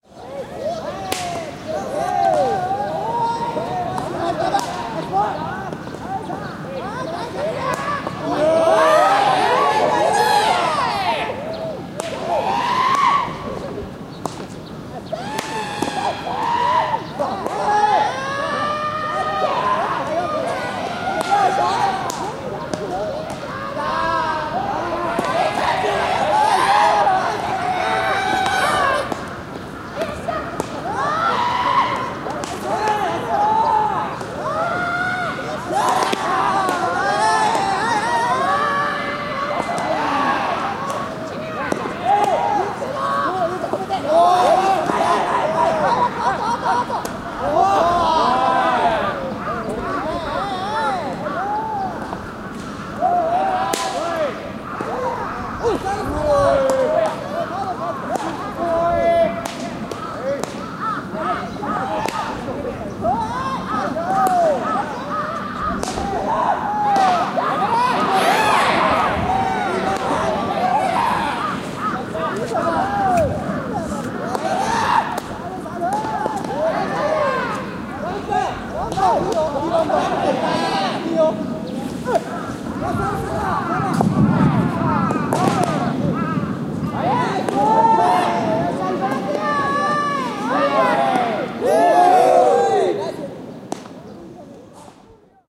Ueno Park Tokyo-Baseball Warm Up
The very strange sounds and cries made by a Japanese baseball team warming up. Recording made in Ueno Park, Tokyo, Japan.
Mini-disc, A/D, sound forge process.
weird, glove, shout, strange, yell, field, sports, Baseball, ball, odd, Ueno, team, warm-up, weird-japan, Japan, field-recording, cries, Ueno-park, bat, athletic, game, baseball-practice, scream, practice, Nippon, cry, Japanese, Tokyo, sport, athlete